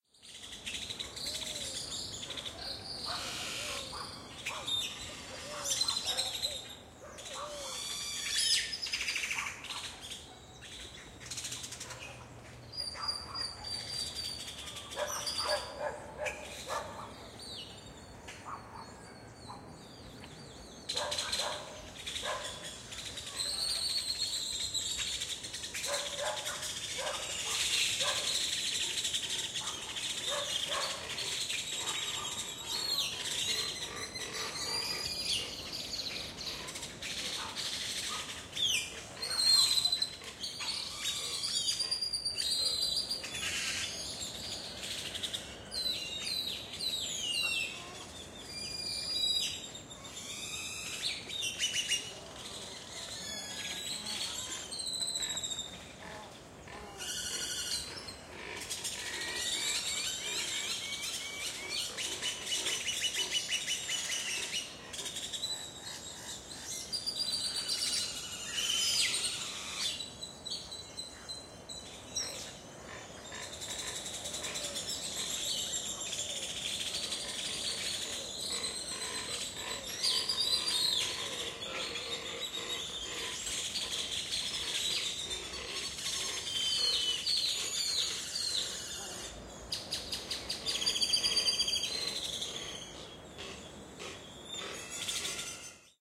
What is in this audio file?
Laguna Miramar Nayarit 6pm
En la laguna de Miramar en Nayarit, Mexico, 6pm. Muchos pajaros distintos; perros del campo vecino. El mar esta a 500m.
Lagune de Miramar, Nayarit, Mexique, 18:00. De nombreux oiseaux, chiens du village dans le fond. L'océan est à 500m.
DECODED MS stereo, Sennheiser MKH30&50
Miramar, lagune, oiseaux, pajaros, birds, nayarit, laguna, Mexico